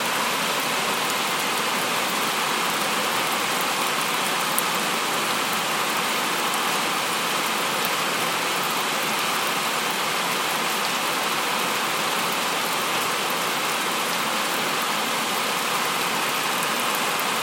The sound of rain near an open window.
nature, noise, rain